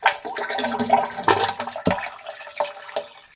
Diarrhea - Toilet
Diarrhea, poop, Sounds